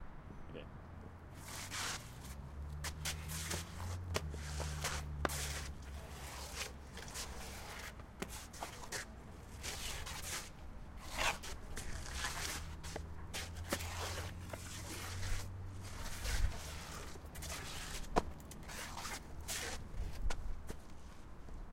Shuffling 3 Front
A small group of people shuffling, to replicate idling zombies.
Crowd, Footsteps, Shuffling